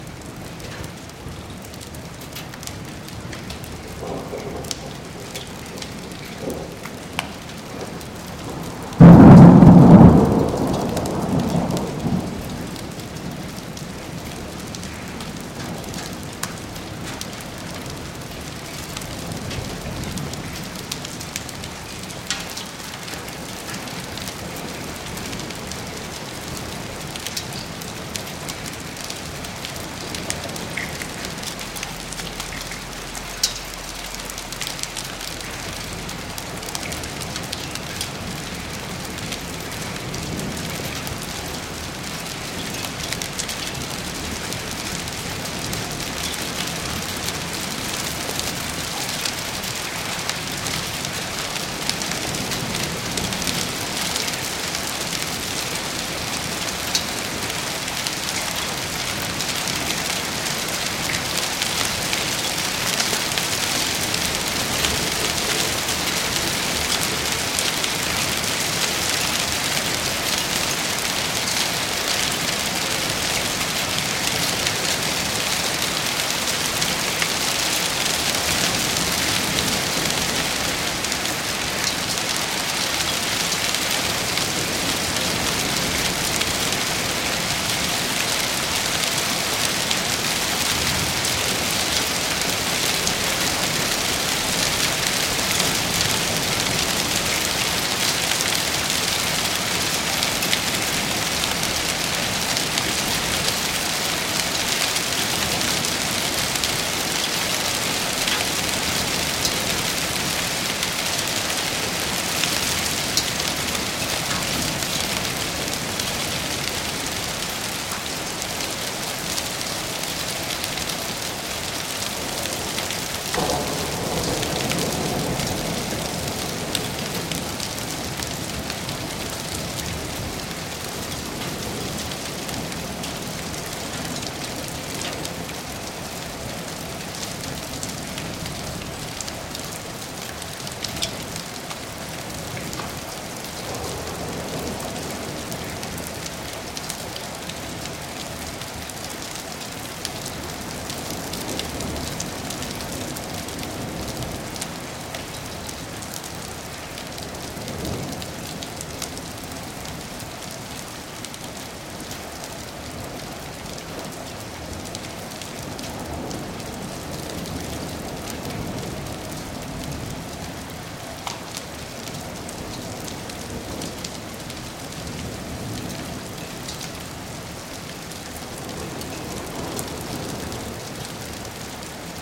sound of a hailstorm, thunders etc/ una tormenta de granizo con truenos